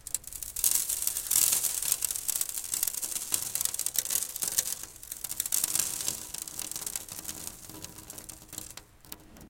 sand, sand pour on glass, glass